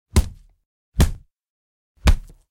3 Bat hits
3 foley hits, wooden bat-on-zombie